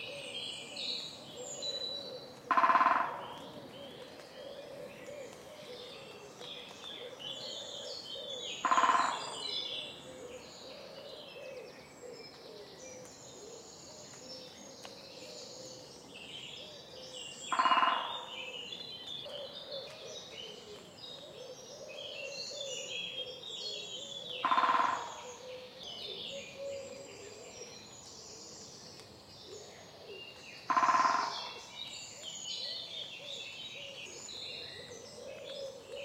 This is a great spotted woodpecker (Dendrocopos major) drumming. It was recorded on the morning of 13th May 2018 in a country park in Essex, UK. The area is mainly comprised of small trees and bushes, though the woodpecker was in an area of larger trees and adjacent to a road and housing estate. Recorded with a Zoom H5 and Sennheiser K6/ME66. There has been some editing to cut unwanted noise, though not through noise reduction. This was carried out with Audacity.
birds, drumming, great-spotted-woodpecker, nature, woodland, woodpecker